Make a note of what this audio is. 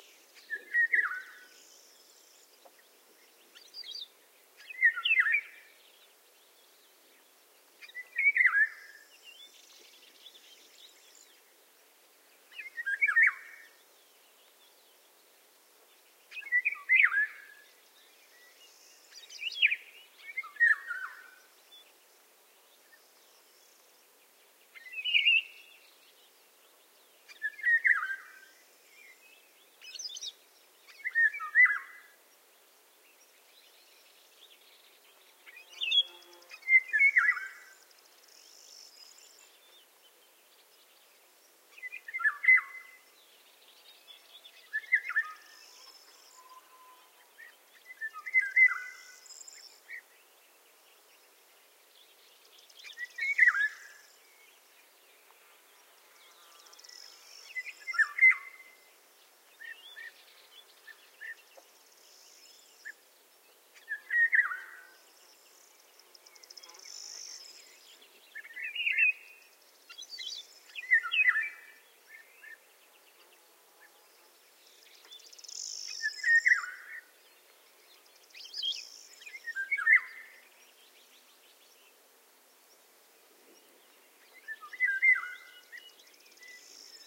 20070427.lamediana.unknown.bird
Resonant call of a bird I couldn't see. Serin, Bunting, Bee-eater in background. EDIT: silly me, obviously the bird is a Golden Oriole, Oriolus oriolus.